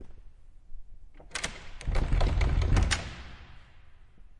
15 Locked door
Locket door opening